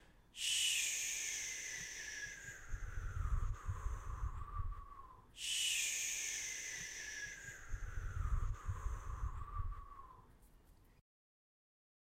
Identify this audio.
This a sound for a teleporter in a game. It is recorded using a Zoom H2n recorder. I recorded myself going woosh in a mic for the teleporter. I also recorded wind to use as a part of the sound for the recordings
Game, Teleporter